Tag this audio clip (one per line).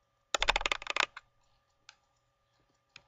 device-fail
metaphor
recorded
vista
windows
xp